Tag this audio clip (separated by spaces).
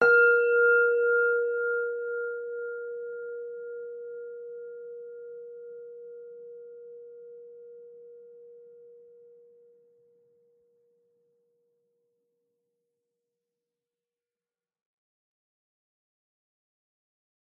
sounds service zen